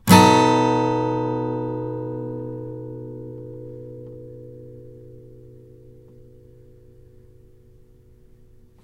More chords recorded with Behringer B1 mic through UBBO2 in my noisy "dining room". File name indicates pitch and chord.
acoustic, chord, guitar, major, multisample, yamaha